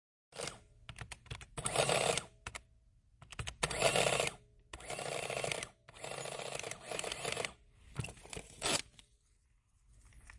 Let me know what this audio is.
Electric adding machine with tape
Hey! If you do something cool with these sounds, I'd love to know about it. This isn't a requirement, just a request. Thanks!
cash-register, till